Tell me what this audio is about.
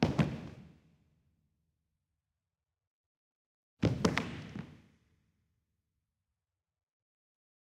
Body falls
An actor collapsing on a stage floor. Mostly thud, not much clothing noise.